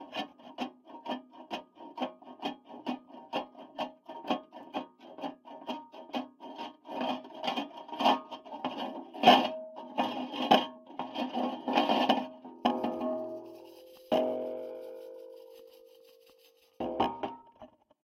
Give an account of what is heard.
A contact microphone recording metal rhythm
metal, noise, rhythm